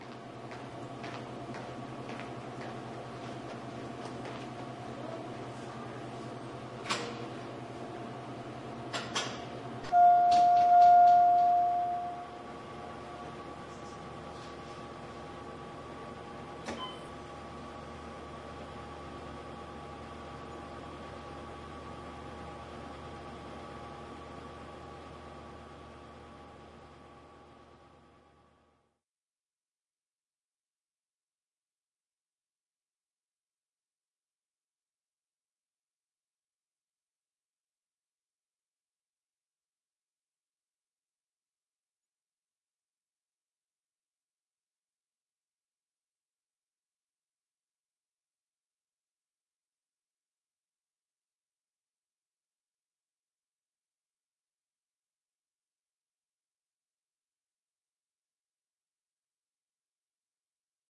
Hospital Waiting Room noise